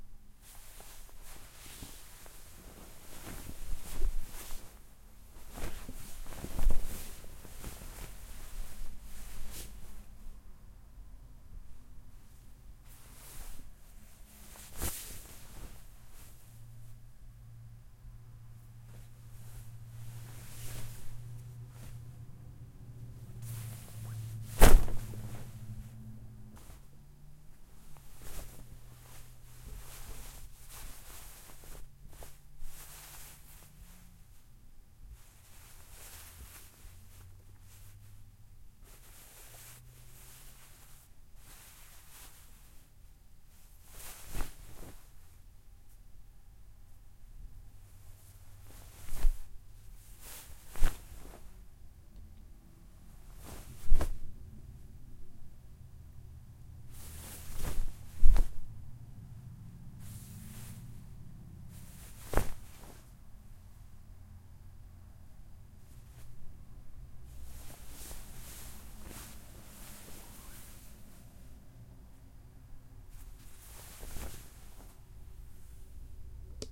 Bed Sheets sound 1
Cloth foley of shifting/moving sheets recorded on the zoom H5. I also denoised and cleaned up any artifacts. Good for ADR. Enjoy!
denoised, quality, house, zoom, sound, h5